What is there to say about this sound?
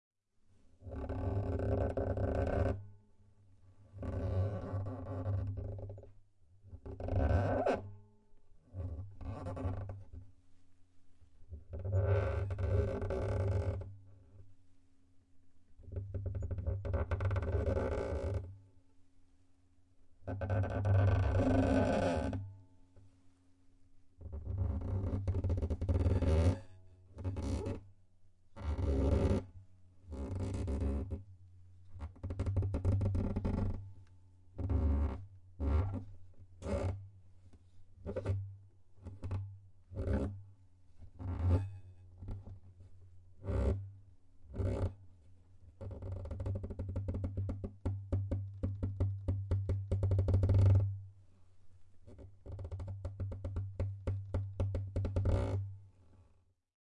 Guitar Wood Creaking
by chance I noticed that my guitar neck was cracking, in a very nice way. I used it, ever since I noticed this, for several sound design gigs. I hope you can use it too.
(recorded with two Neumann KM184 mics and a SSL XLogic pre-amp.)
creak, creaking, creaky, floor, guitar, squeaking, wood